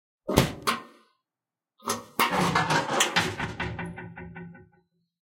1970 VW Bug trunk lid
Opening and closing the engine compartment lid.
Bug, car, close, hinge, old, trunk, VW